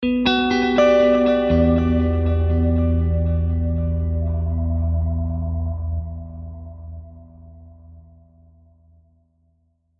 Emin 9 guitar chord interup
an emin9th chord that was interupted when the phone rang listening back it kind of worked
ambient, guitar, phone